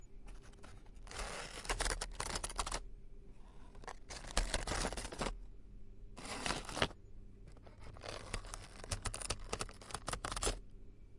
Me flipping through an assortment of tea bags in a fancy office tea box (the box is made of wood, that's how fancy it is). It sounds like a flipbook, hence the title.